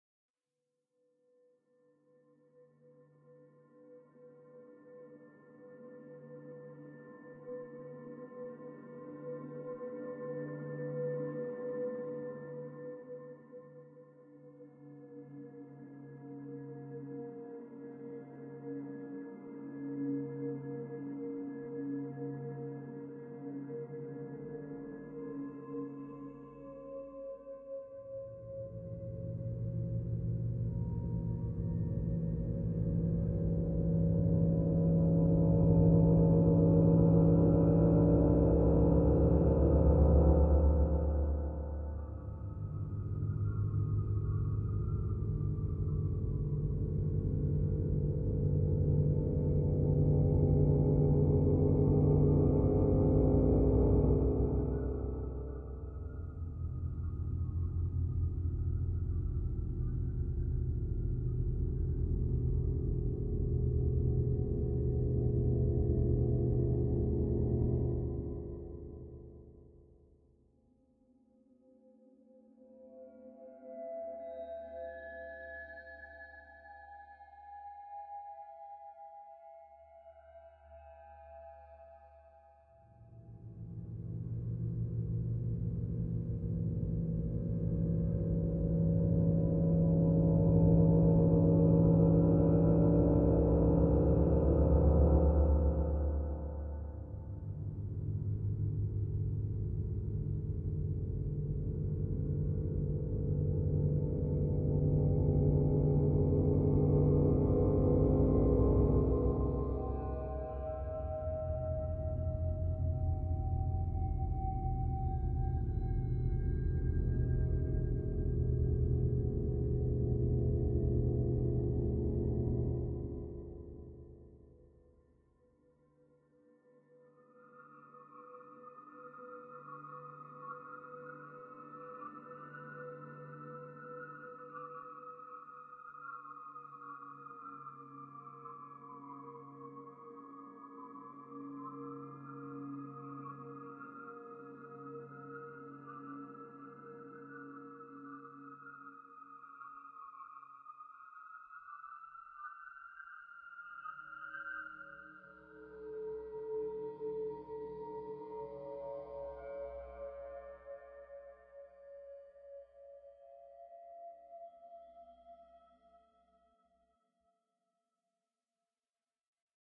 Ambient level / location night sound 5
Level sound, ambient.